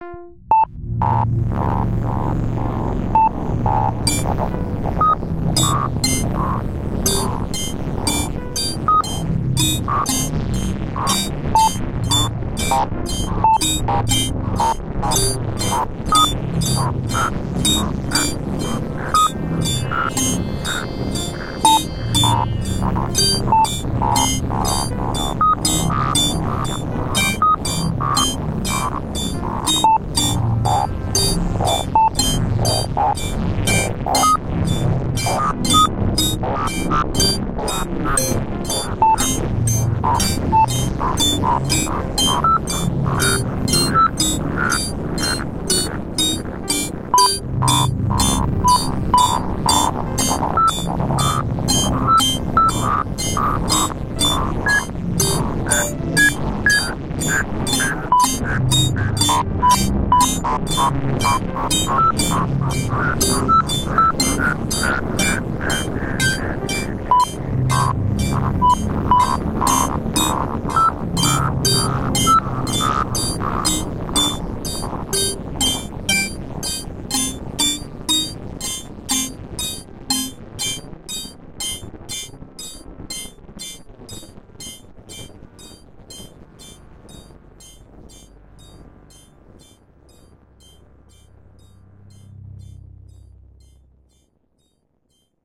Dial Error
noise, VST, glitch